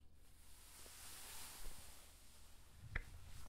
rougher curtain brush
This is the sound of a hand opening the curtains. The curtains are stiff and in a carpeted room with concrete walls. It was recorded on a tascam DR-40.